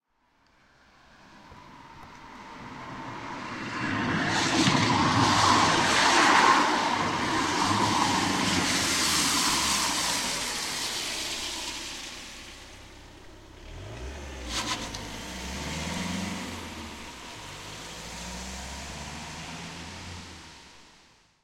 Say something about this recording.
a car, that is arriving at an empty crossway, stopping and continue driving. stereo record on a wet street surface after a rainy day